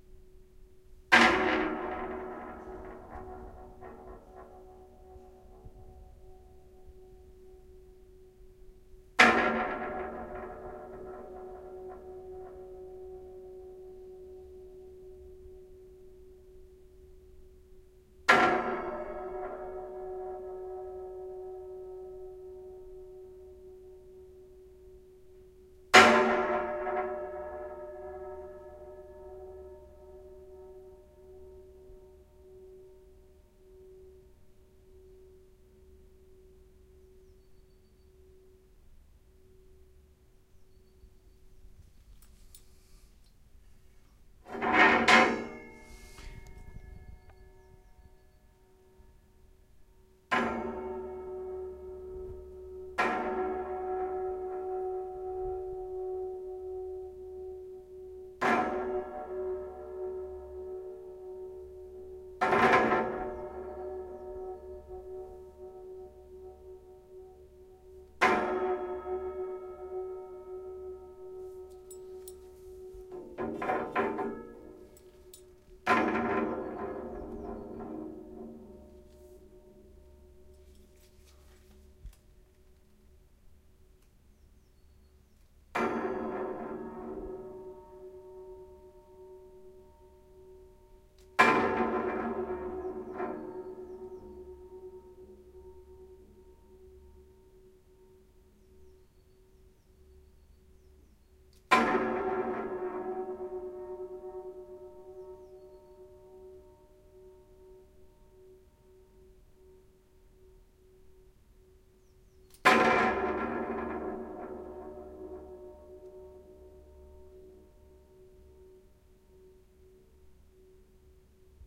Metal drying frame gong
Banging a drying frame in a bathroom to produce a metallic, "gong-like" sound.